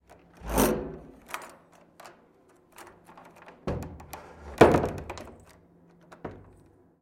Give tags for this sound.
near
creak
open
closing
door

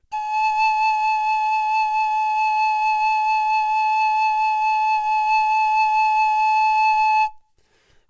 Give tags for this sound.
a2
pan
pipe

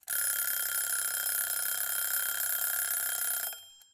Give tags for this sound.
Cooking
Foley
Home
House
Household
Indoors
Kitchen
Percussion

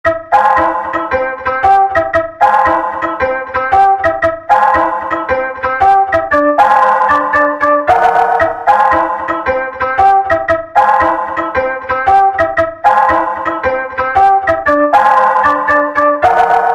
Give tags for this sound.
new
synth

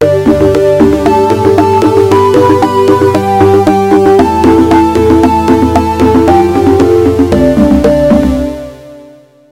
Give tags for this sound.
acid
ambient
backdrop
background
bassline
electro
glitch
idm
melody
nord
rythm
soundscape
synthesizer

